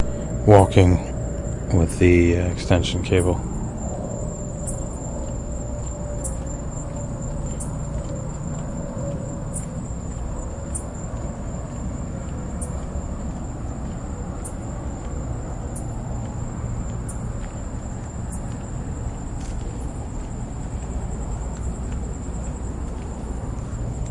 SonyECMDS70PWS walking ext
electet, foot-steps, microphone, walking, test, field-recording, digital